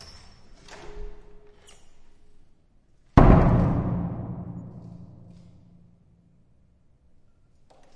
Queneau ouverture ferme prote reson 02
ouverture et fermeture d'une porte dans un hall
close, door, hall, open, reverb